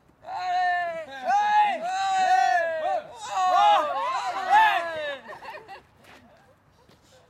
Group of people - Cheering - Outside - 02
A group of people (+/- 7 persons) cheering - exterior recording - Mono.
cheering, group, people